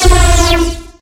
Phasing Beam Variation 02
Used FL-Studio 6 XXL for this sound.
Just modified the "Fruity Kick" plugin and Modified it with lots of Filters,Phasing and Flange effects.
This Sample comes in 3 variations.